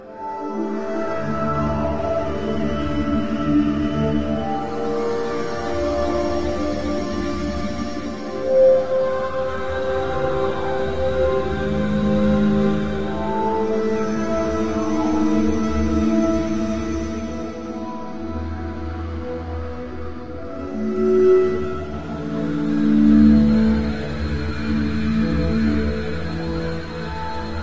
Follow Me...
made with cakewalks rapture.
ambient, harmony, idm, rapture, soundscape